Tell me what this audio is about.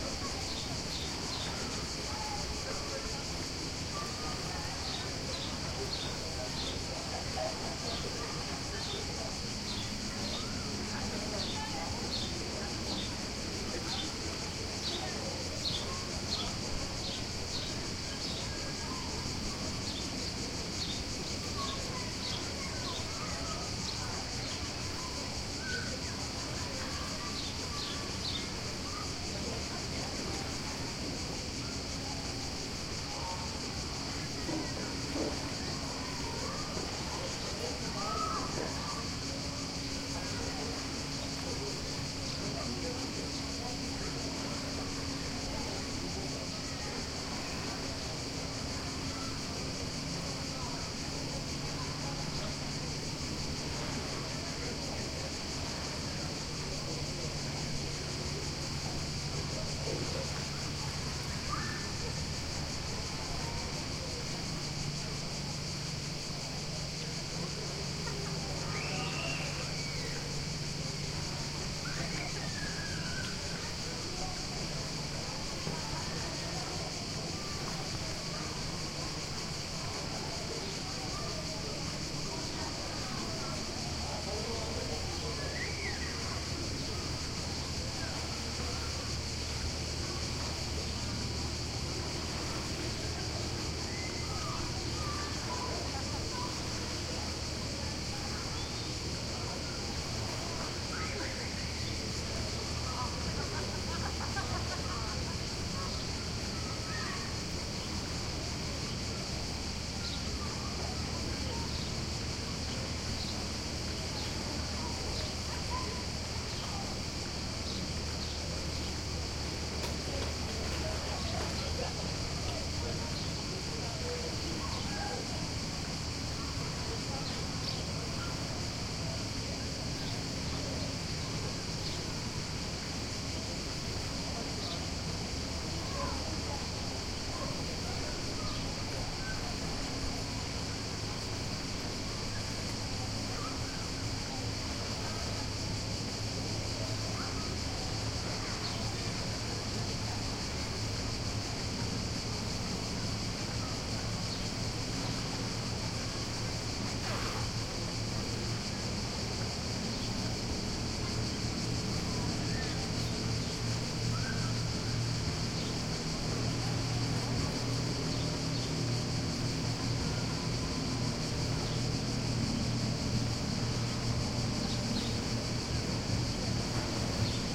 130725 Brela WideAngle R 4824
Wide-Angle surround recording of the beach at Brela / Croatia, recorded from a distance of approx. 40m and a height of approx. 25m. It is a warm summer afternoon, the beach is teeming with (mostly very young) bathers, crickets are chirping and swallows are flying in abundance. A nice, peaceful, relaxing beach-atmo.
Recorded with a Zoom H2.
This file contains the rear channels, recorded with a mic-dispersion of 120°
atmo, beach, bird, busy, children, crickets, field-recording, lapping, loud, maritime, noisy, people, sea, shore, swallow, water, waves, wide-angle